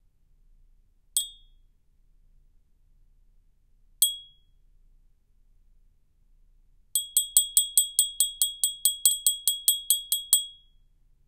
200809-SHOT GLASS STRIKES
-Shot glass clanking and striking